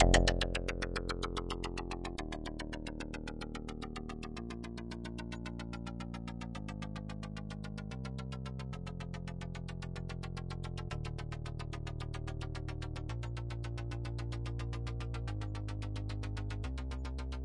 ChoFlg Bass
110bpm, F, bass, korgGadget, loop, minor, synth